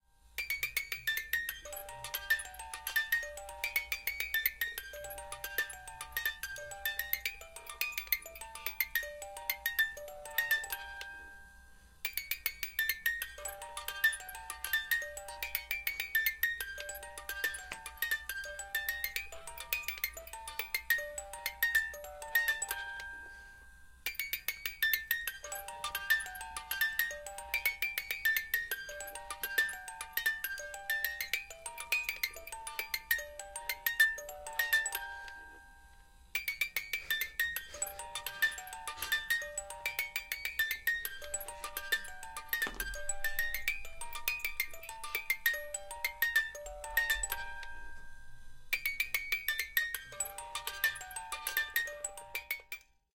music of musicbox für elise